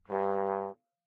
One-shot from Versilian Studios Chamber Orchestra 2: Community Edition sampling project.
Instrument family: Brass
Instrument: OldTrombone
Articulation: short
Note: G1
Midi note: 32
Room type: Band Rehearsal Space
Microphone: 2x SM-57 spaced pair